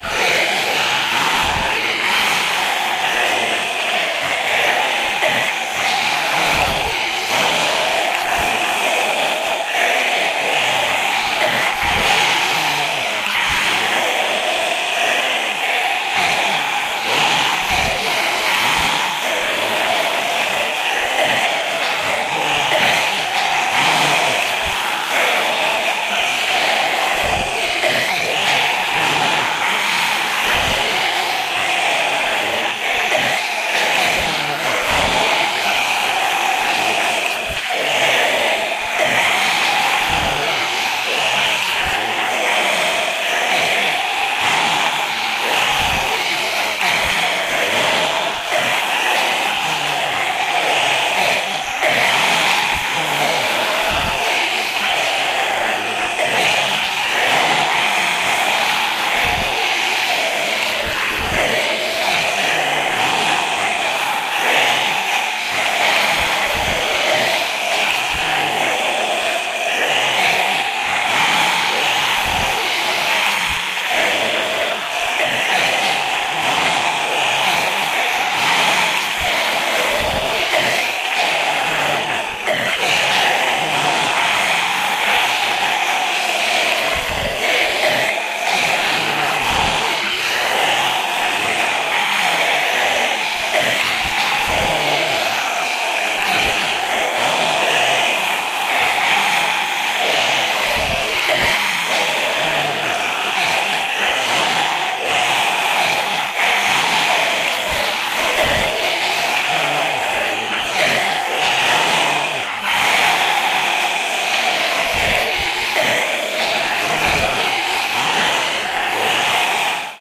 Zombie Group (10 Zombies)
A group of about 10 zombies. It was mixed from the same base track.
vocal, zombie, horror, disease, sound